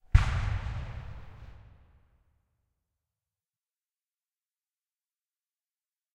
CW Cannon Single1
Recorded at a US Civil War re-enactment, Oregon, USA, 2012. close-by single cannon fire, lightly edited to isolate. Recorded about a football length from the action with a Tascam DR-08.
cannon, cavalry, Civil-War, field-recording, gun